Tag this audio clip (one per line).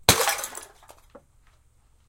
bottle-breaking
heavy
liquid-filled
bottle-smash